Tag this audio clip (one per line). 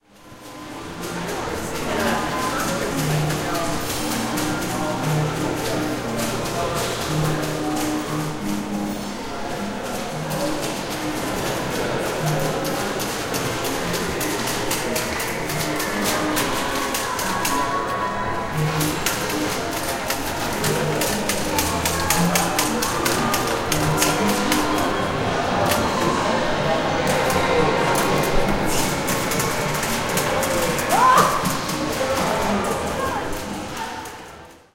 people
speech
museum
noise
ai09